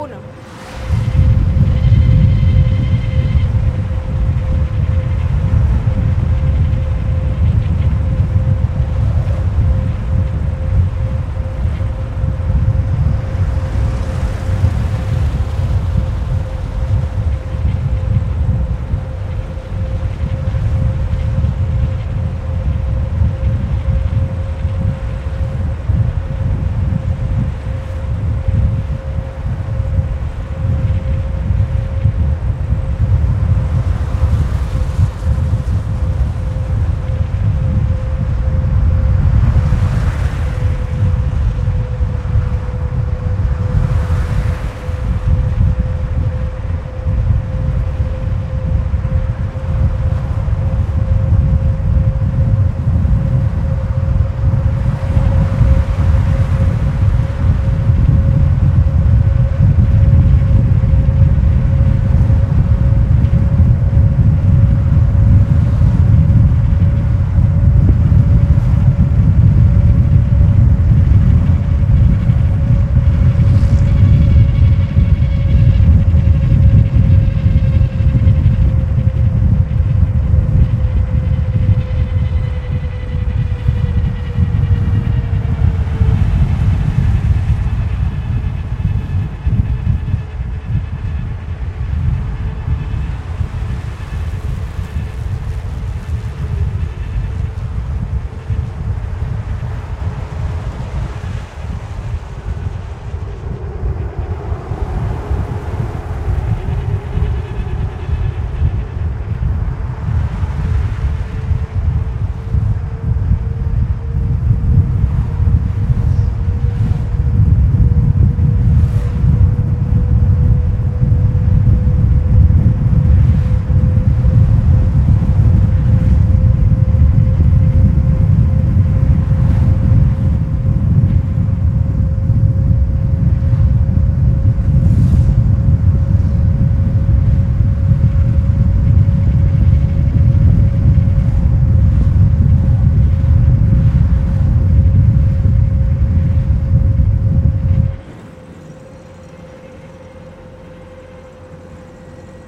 autopista regional del centro, viento fuerte, carros
Sound recorded in the "Regional Center Highway" between the city of Maracay and the city of Caracas. Inside a NIVA, with an sennheisser mkh416 looking out the car window. The wind strikes the mic hard! record it for experimental purposes!! Enjoy
cars, doppler, fast, field-recording, highway, trafic, velocity, wind